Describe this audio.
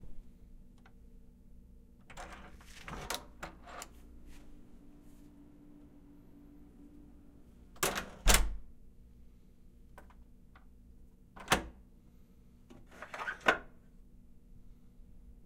Door open close deadbolt
Opening and closing doors. deadbolt and hasp
hotel, hasp, field-recording, door, H6, lock